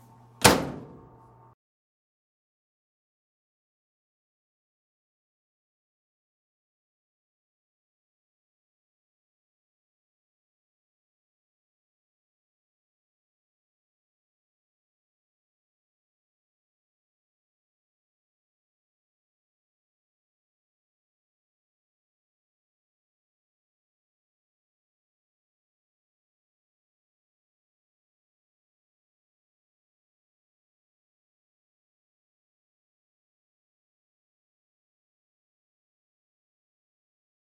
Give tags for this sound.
class intermediate sound

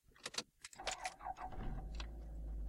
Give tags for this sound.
car car-start engine engine-start ignition

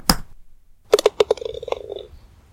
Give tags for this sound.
golf golfing green hole-in-one mini-golf minigolf minigolfing putt putter putting

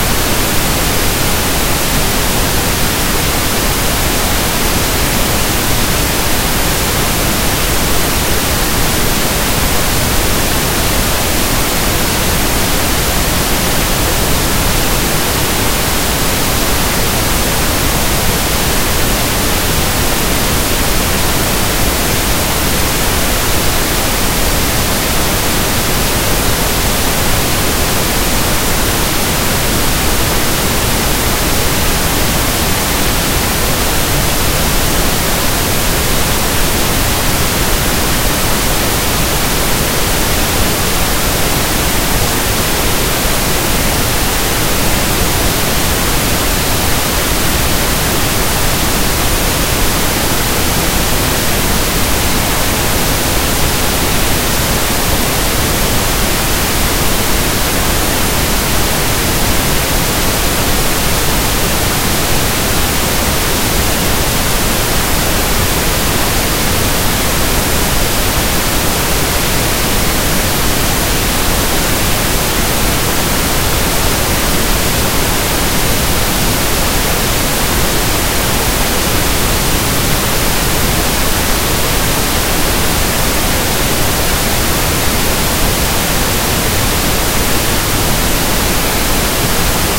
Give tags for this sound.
Disgruntled Long Loud Noise Radio Rumble Static Statiky Television TV White

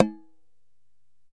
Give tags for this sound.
bang banged can canister collided collision container crash crashed empty hit impact impacted knock knocked metal metallic smack smacked strike struck thump thumped thunk thunked thunking whack whacked

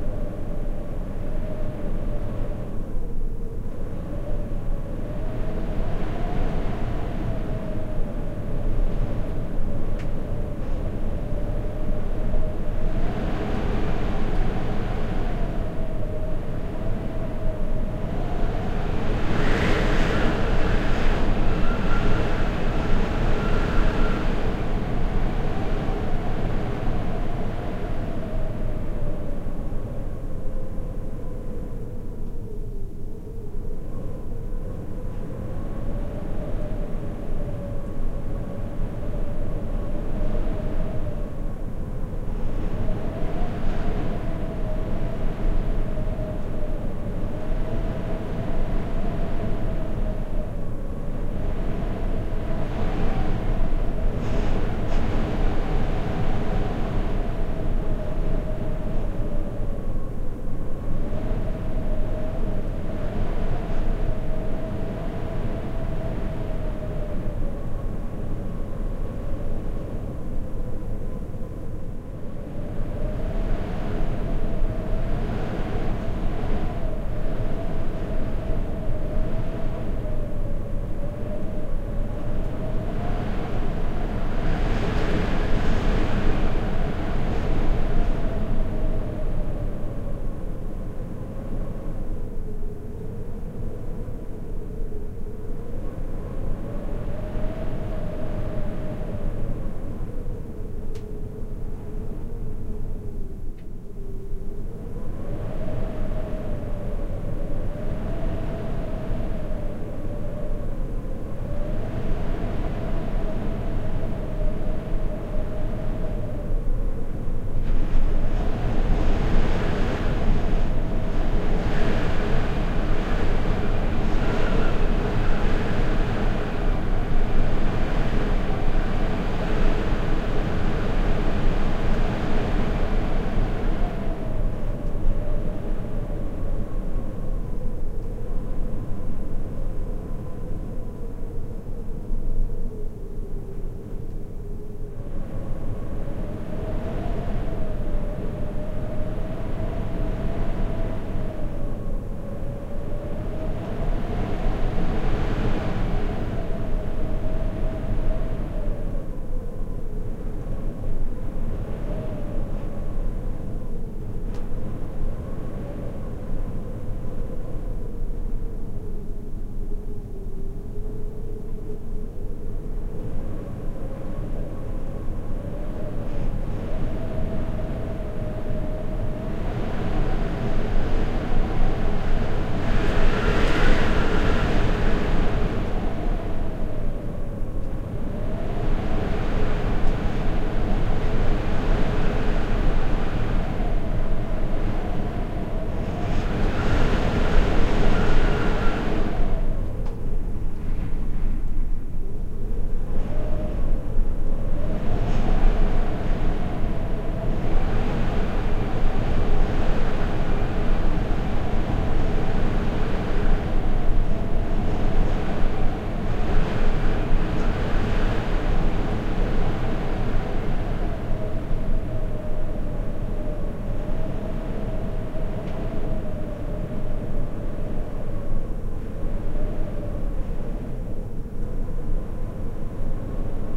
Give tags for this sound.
ambient whistle

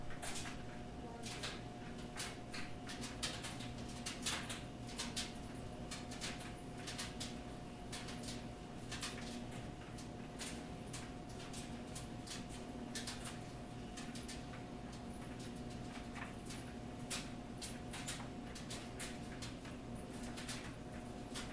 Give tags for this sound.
field-recording laundry room

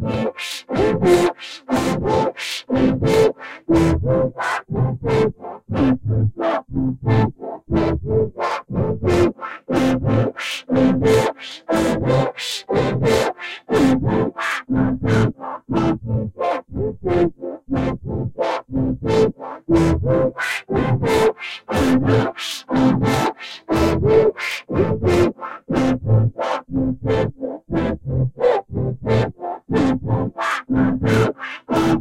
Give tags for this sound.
120-bpm sequenced-filter musical music C-major filter loop

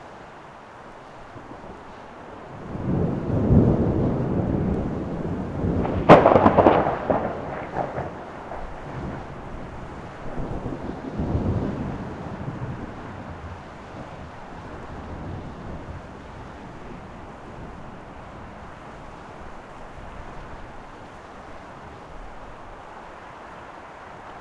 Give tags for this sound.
thunder-storm rain storm nature thunder weather lightning field-recording

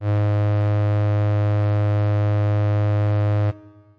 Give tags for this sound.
synth pad

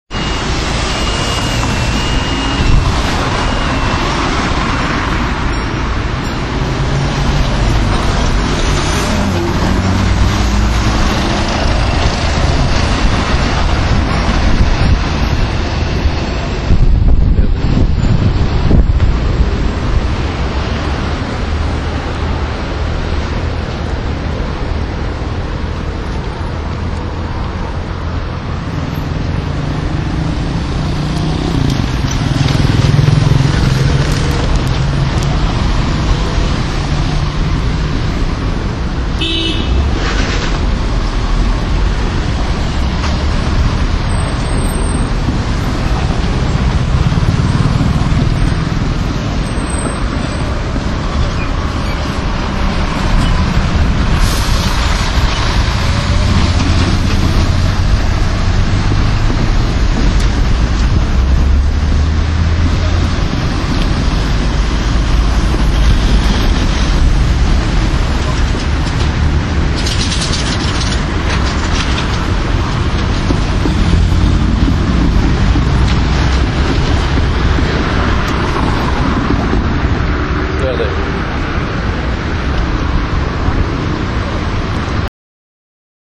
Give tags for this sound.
greek traffic athens cars

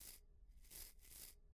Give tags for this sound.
noisy rub touch rough

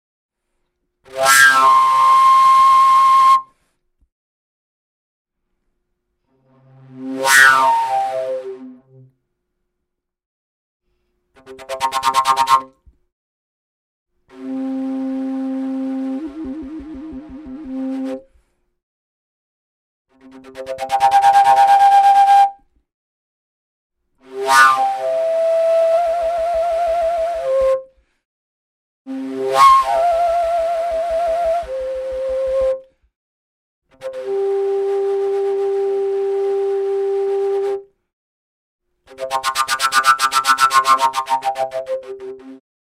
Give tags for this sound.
ethnic-instruments fujara overtone-flute overtones pvc-fujara sample woodwind

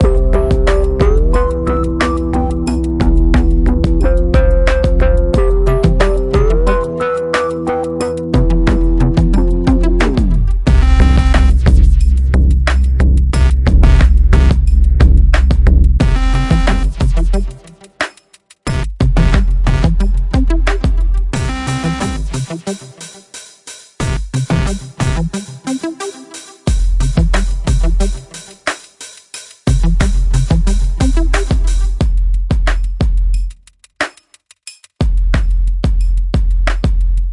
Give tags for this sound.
dubstep dowload now

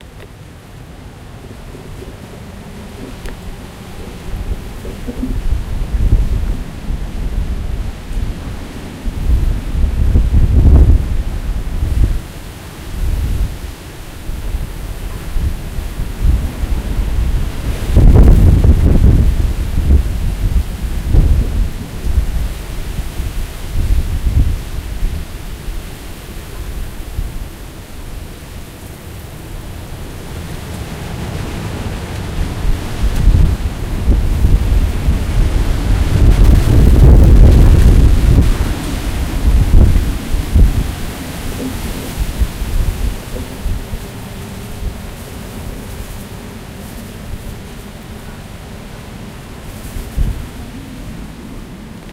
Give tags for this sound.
nature weather Typhoon storm rain

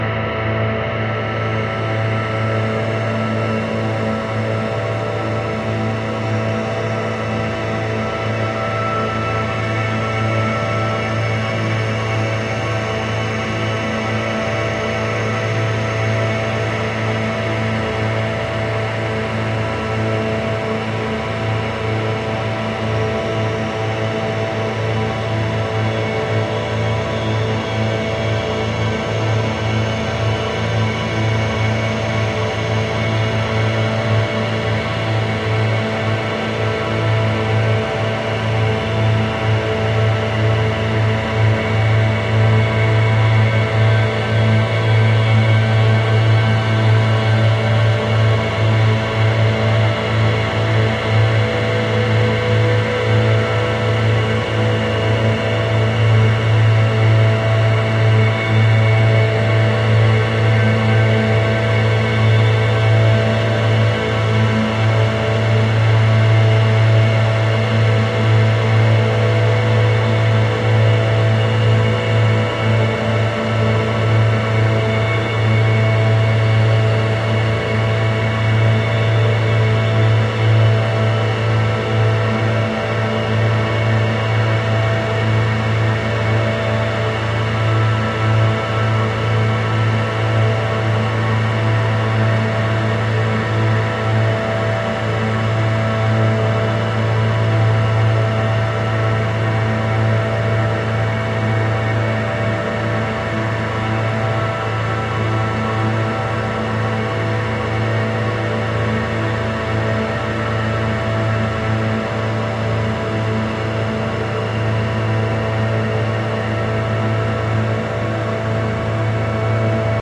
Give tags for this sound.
drone evolving soundscape

